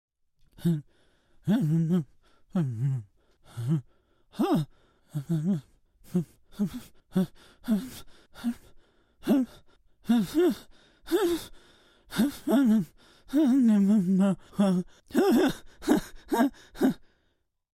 Human Male Crazy Mumbles 1
Had a friend mumble.
crazy, human, male, mumble, noises